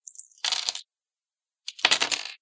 throwing dices on a table